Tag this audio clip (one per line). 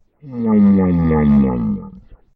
cut
deep
yawn